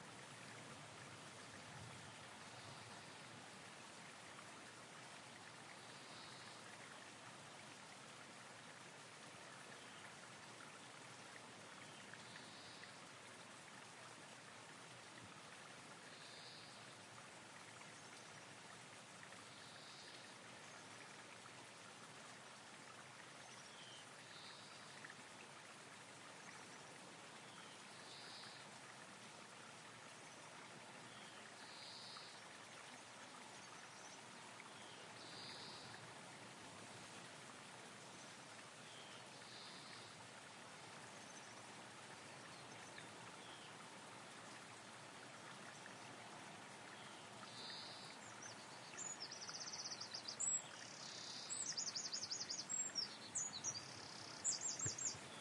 birds near a string of falling water
h4n X/Y